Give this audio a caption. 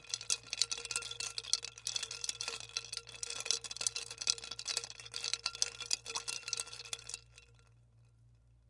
Stir Ice in Glass FF382
Liquid and ice stirring ice in glass, utensil hitting glass softer
utensil, ice, liquid